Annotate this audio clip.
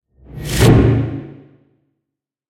SFX Thrilling Build-Up 3 (Made at Paradise AIR)
I recorded a lot of sounds in the area, and edited them into a series of thrilling sound effects.